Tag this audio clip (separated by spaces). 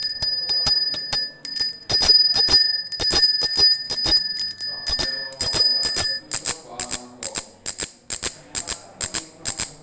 bicycle bike cycle horn mechanic italy bell